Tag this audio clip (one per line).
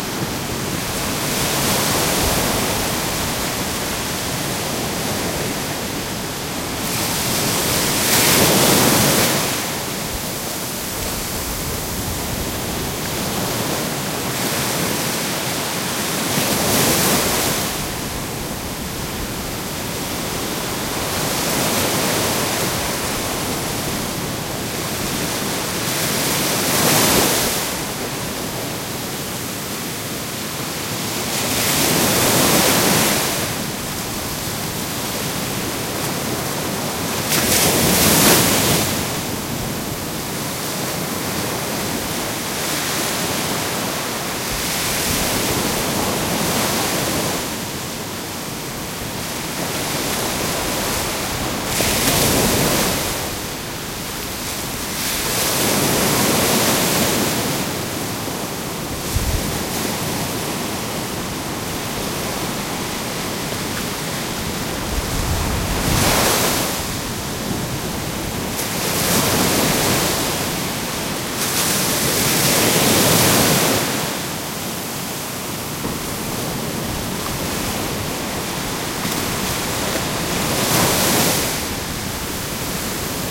waves
heavy
beach